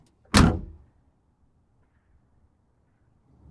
auto trunk close hard semi slam from outside
auto, close, ext, from, hard, outside, semi, slam, trunk